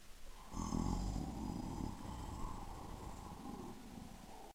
Monster Growl 2
Small growl done by doing a low pitch, mellow growl. Small pitch change.
scary, unsettling, Horror